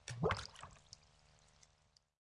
A small stone dropped on water